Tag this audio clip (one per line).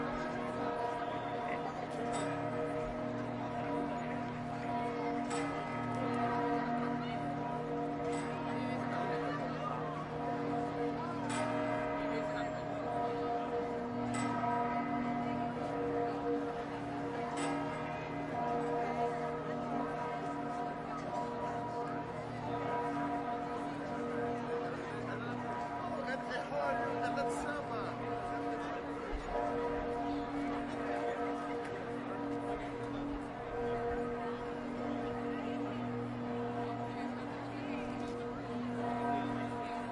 bells
field-recording
ambience
general-noise
crowd
ambiance